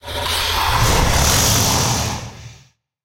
Dragon roar
I recorded some pissed off reptiles behind the scenes at the local zoo and put them together into this sound. Among the animals recorded are a 10 foot American Alligator and a Galapagos Tortoise. Sounds were recorded using a Tascam DR-05 Digital Recorder.
Dragon, Roar, Lizard, Monster, Creature